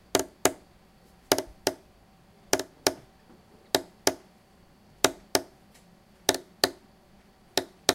Mysounds HCP Maëlle square
This is one of the sounds producted by our class with objects of everyday life.
Pac, France